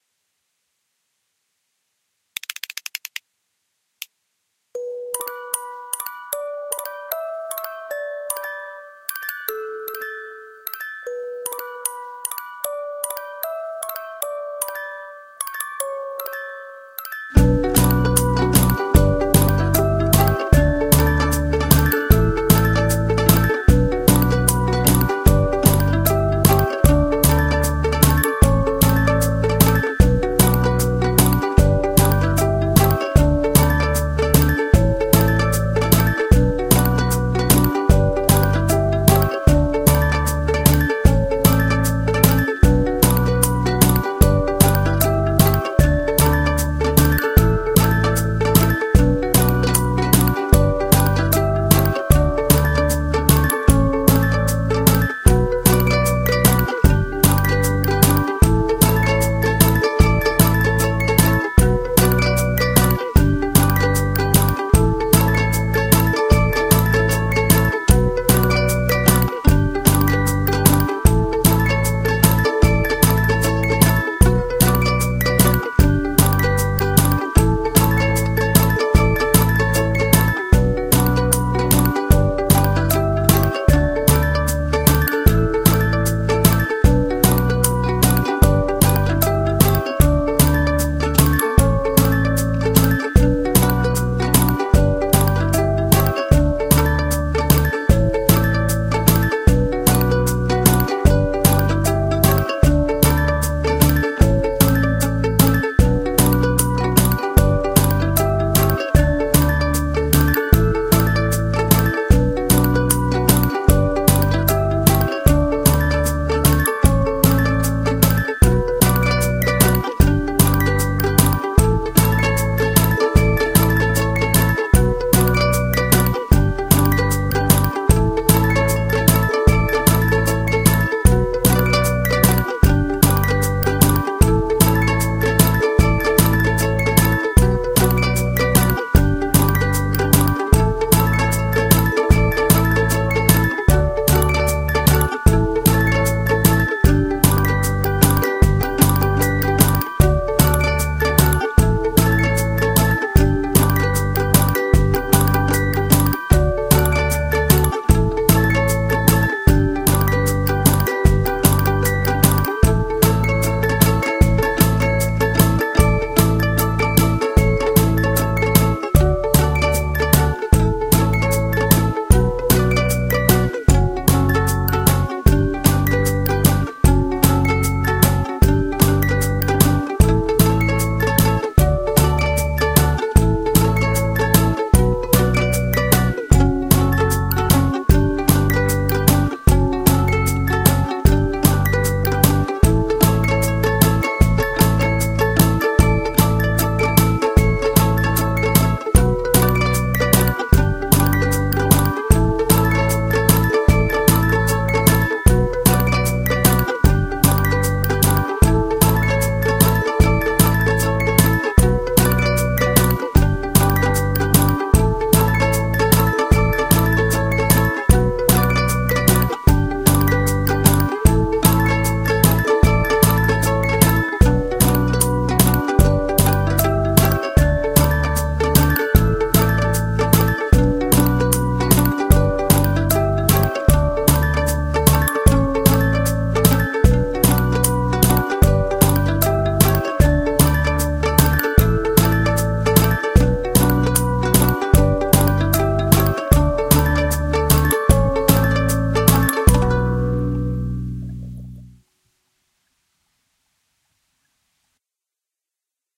arrangement using eliasheuninck's music box samples